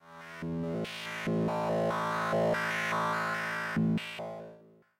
Retro Random Sound 07
Its a bit of melodic tune to it, but its more a effect like a retro machine sound.
Thank you for the effort.